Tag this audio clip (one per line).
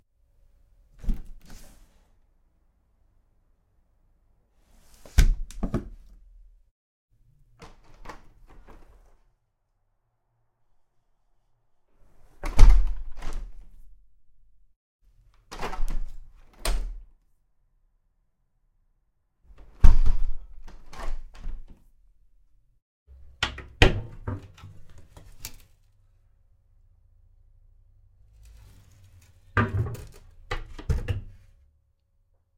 cellar
close
handle
open
roof-light
skylight
tilt
tip
window